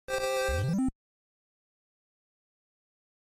8-bit mini win sound effect
This is a sound effect made in Famitracker with the Namco 163 extension that could be used to signify a win or success
win, video-game, game, chip, success, event, video, finish, retro, collect, 8-bit